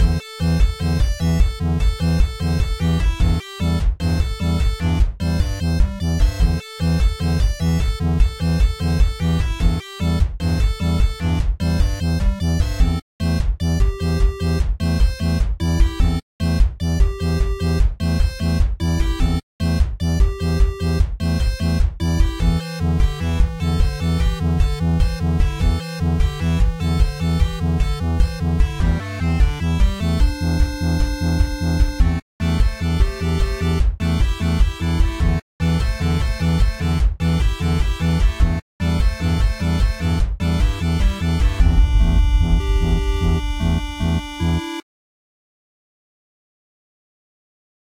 neon synth
beats, music, beepbox, synth, song, neon